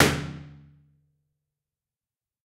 ir,balloon burst,hotel shower,glassy resonance,stereo,sony d100
Impulse response file for use in convolution reverbs.
I recommend Convology XT by Impulse Records, free and easy to use.
Recorded in a glass box of a hotel shower. And we all know glassy resonant shower reverb is the best.
Recorded with a Sony D100.
Self-reminder to bring more balloons next time.
balloon, burst, explode, explosion, impulse-response, ir, pop, reverb